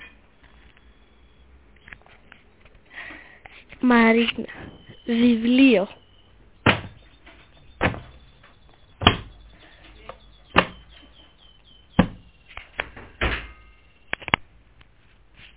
Sonicsnaps made by the students at home.